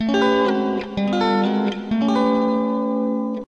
Ibanez guitar processed with Korg AX30G multieffect ('clean'), 4-string slides up and down, fingerpicked
electric-guitar, musical-instruments